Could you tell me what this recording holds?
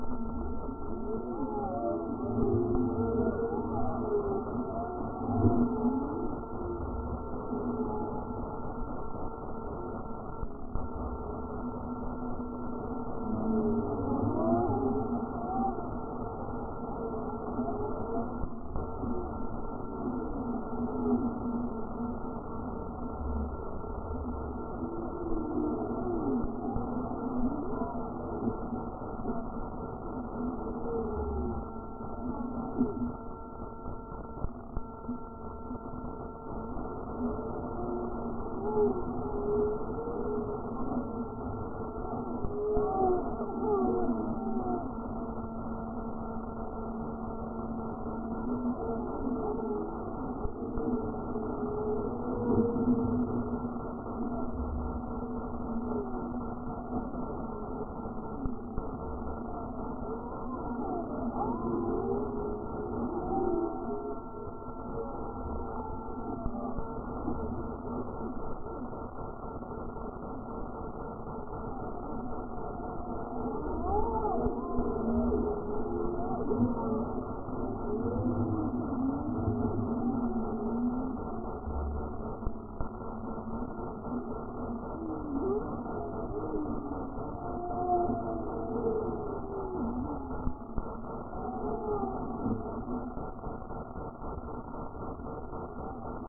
recreating a radio reception sound with alien female voices modulations
exomusicology
"Though musicology is defined as "the historical and scientific study of music," the term is typically applied only to the study of music from the European classical tradition. "Ethnomusicology" is used to describe the study of nonwestern musical traditions and to the comparative study of different musical cultures. The more general term exomusicology (from the greek prefix exo, meaning 'external to' or 'outside of') is more appropriate to the study of nonhuman musical traditions, much as exobiology refers to the study of non-Earth life forms, and exolinguistics to the study of alien languages"
(Sethares)
electronic algorithmic sonic objects
alien-female, exomusicology, radio, rdioastronomy, sonic-objetc